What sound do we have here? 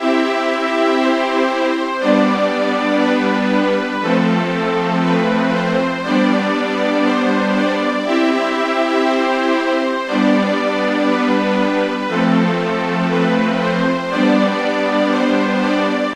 synth symphony loop 119bpm